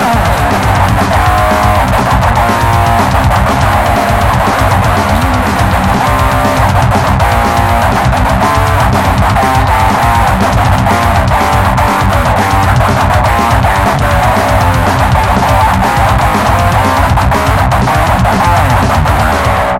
Metal Loop
A nice and powerful loop, the guitar is recorded directly from my amplifier and for the drums I used Rhythm Rascal.
Rock, Guitar, Drum, Metal, Electric, Shred